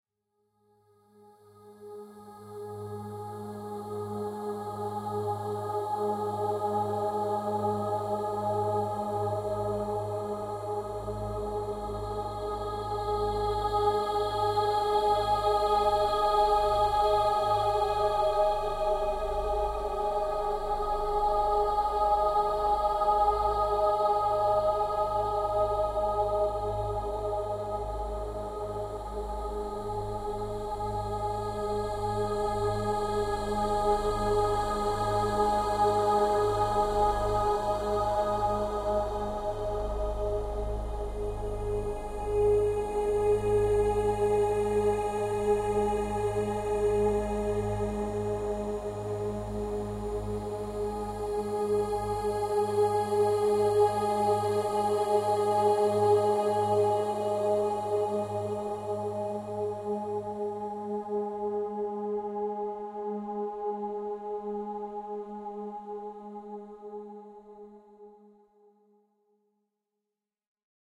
An ethereal sound made by processing a acoustic & synthetic sounds.
convolution, emotion, ethereal, choir-synthetic, blurred, angelic, synthetic-atmospheres, floating, atmospheric, formant